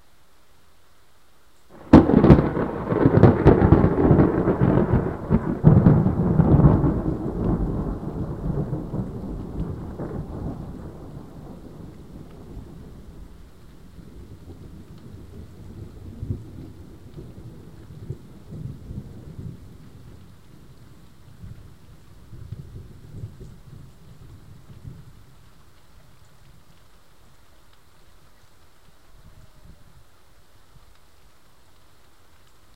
Huge thunder was recorded on 30th-31st of July, nighttime in a thunderstorm occured in Pécel, Hungary. The file was recorded by my MP3 player.
lightning, storm, rainstorm, thunder, thunderstorm, field-recording